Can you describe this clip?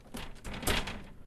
A sound of someone trying to open a door.
Recorded with Zoom H4n and edited with Audacity.
This was recorded in a classroom.